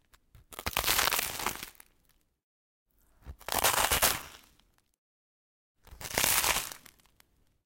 bite in crunchy bread
three different bites in chrunchy bread, pure recording with an highend dpa omni directional microphone, october 2018, no preprocessing, no filtering in postproduction,